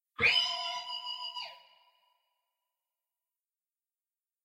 I have no idea if this is sounds real but i hope it does a little. I created this with virtual guitar program. I played with the pitch and volume. Its all free enjoy.
FREE